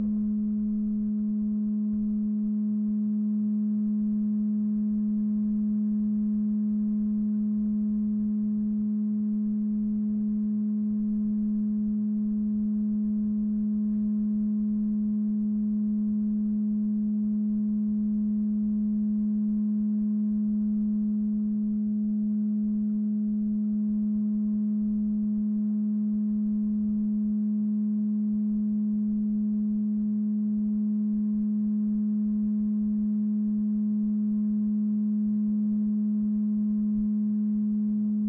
tone heard through bathroom wall2
through, heard, bathroom, tone, wall